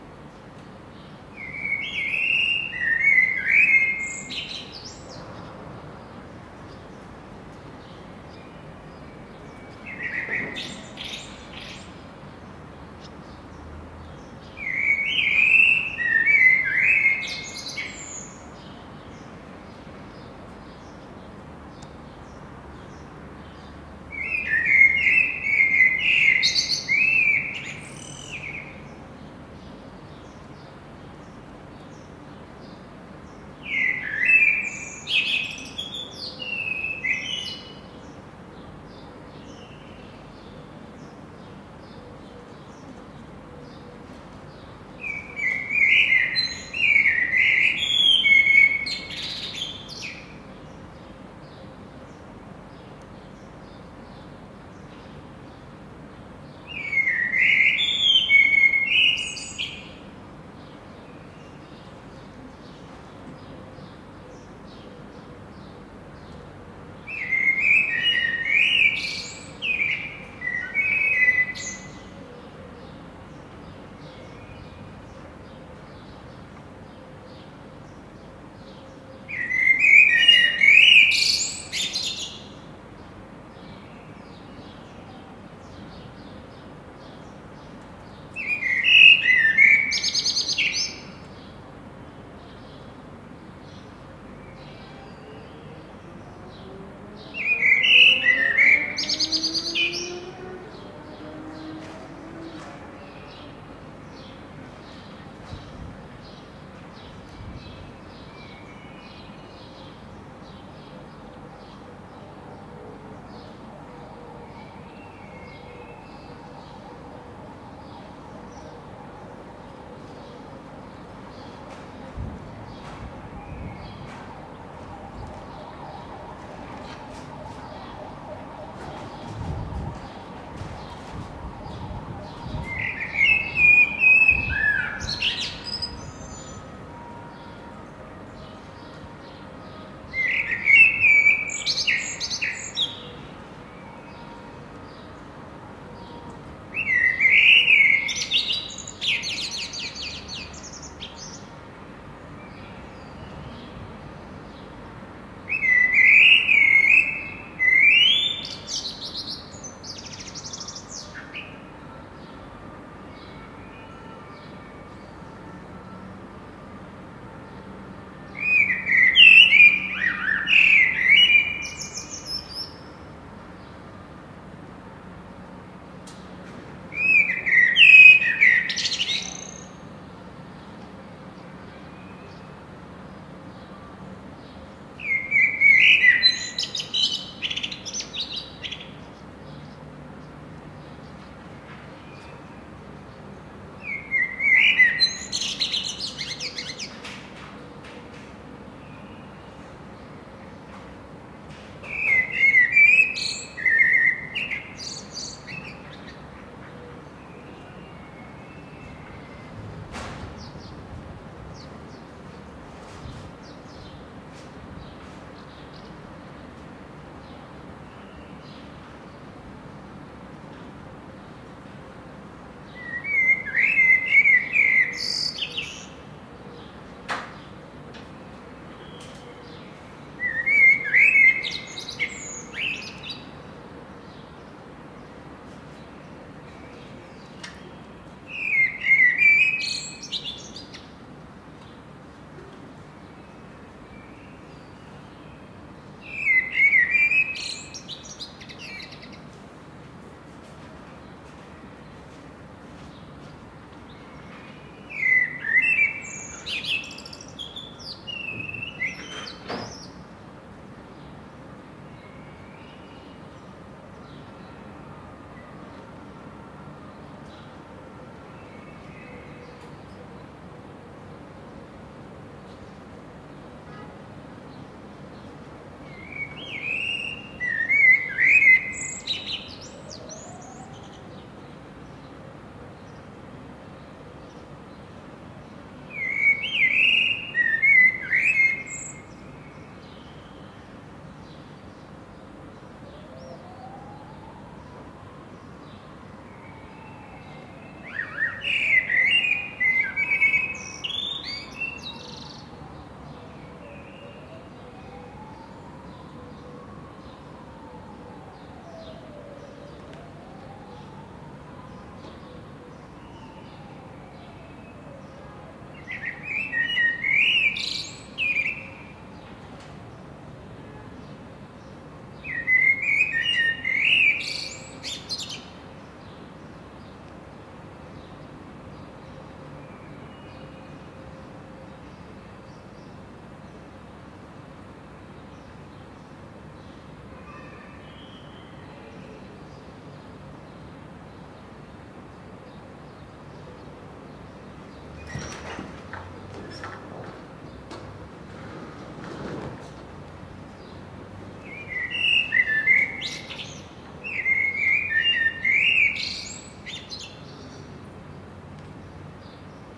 mono recording of a male blackbird making trills, city noise in background. If you pay attention you can distinguish the half-dozen 'words' the bird uses interchangeably to construct and ever-changing song. Nice! Sennheiser MKH60 into Fostex FR2LE.